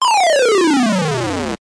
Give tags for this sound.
down; retro

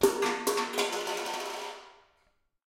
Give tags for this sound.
watering; floor; hard; dropping; can; reverbant; metal; hitting; falling

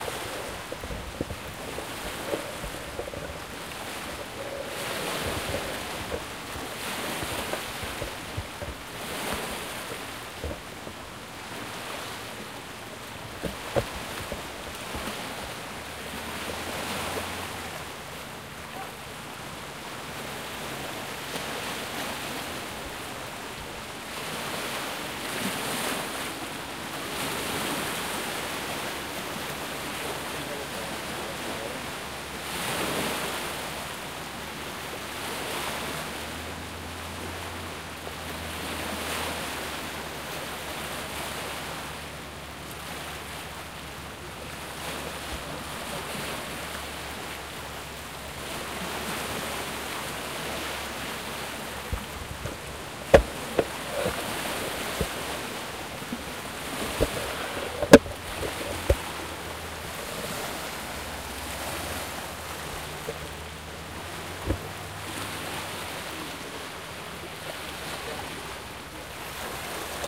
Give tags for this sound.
lake; ocean; shore; splashing; surf; water; waves

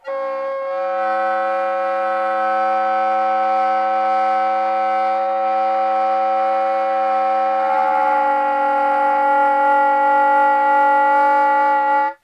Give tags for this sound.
multiphonics; sax; saxophone; soprano-sax

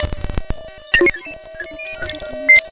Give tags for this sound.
analog; bleep; cartoon; commnication; computer; computing; connect; data; digital; effect; film; funny; future; fx; info; lab; movie; oldschool; plug; pod; retro; sci-fi; scoring; signal; soundeffect; soundesign; soundtrack; space; synth; synthesizer

